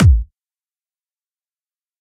KD Trance 01
A layered and processed kick drum I made, suitable for trance or techno.